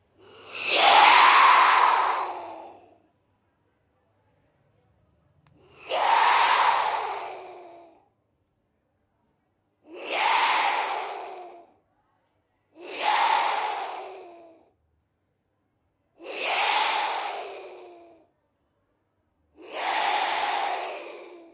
This audio was recorder with a ZOOM F4 + MKH 416, for a mexican documental of the virgin of Zapopan, in GDL,Jalisco.Mex.